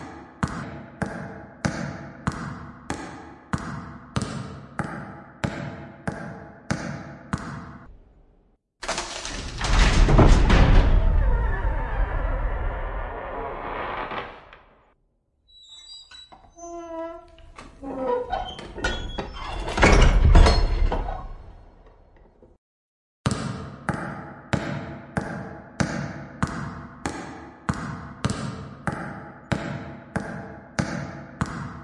Walk to dungeon
Walking to dungeon, opening and closing door, and walking back